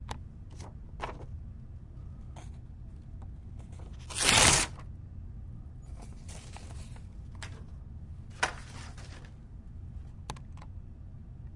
A shorter paper rip